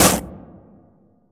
Fast Collision Reverb
A short collision sound of something crashing or some kind of mechanical object being hit and smashed.
Calf Reverb used in Audacity.
break, car, collision, hit